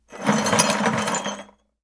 Broken Rattle
Moving around some broken pottery in a plastic bucket.
chains, glass